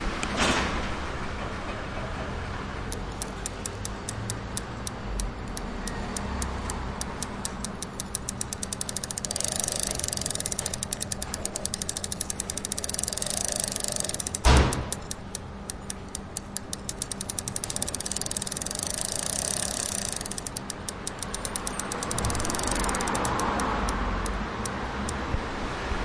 Reverse bicycle gears
Turning bicycle gears in reverse.
bicycle, gears, Reverse